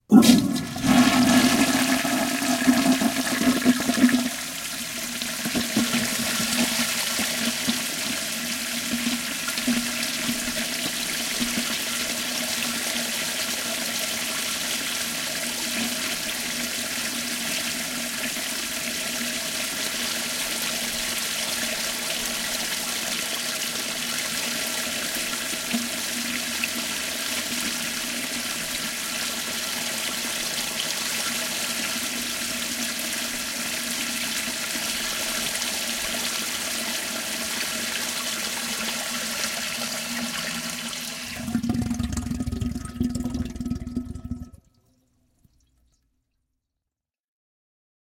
This is the sound of an old tankless toilet, ca. 1945, with a flushometer mechanism being forced to cycle water for an extended period of time after flushing. Recorded with a Zoom H1N and it's internal microphones.